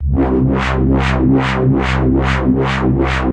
Bass loops for LuSH-101
4x4-Records, Dance, EDM, Electric, J-Lee, Music, Pad, Riser, compressed, sound-effect, soundeffect